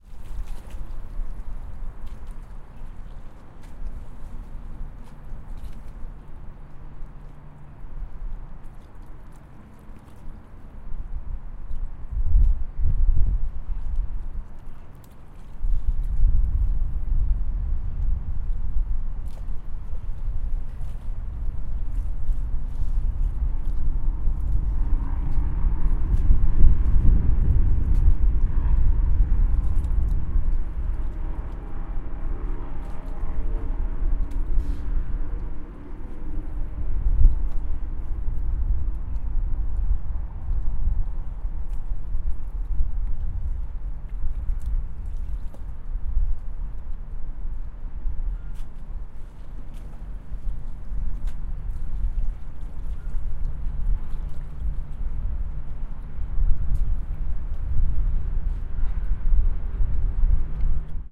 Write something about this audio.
Water Lapping Dock
Elaine, Field-Recording, Koontz, Park, Point, University